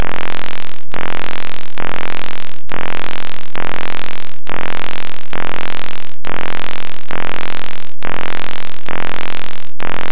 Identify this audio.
These clips are buzzing type audio noise.
Various rhythmic attributes are used to make them unique and original.
Square and Triangle filters were used to create all of the Buzz!
Get a BUZZ!
Artificial, Buzz, Buzzing, Factory, Industrial, Machine, Machinery, Noise